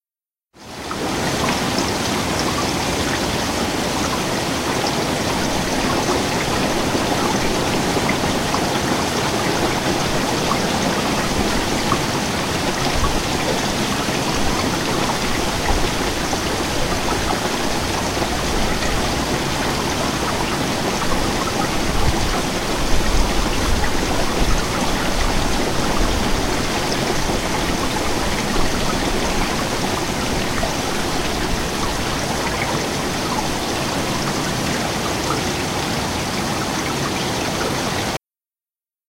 Midnight summer rain part 2
Still raining steadily, though not so hard. You can hear the water running from the eaves.
field-recording, water, rain, weather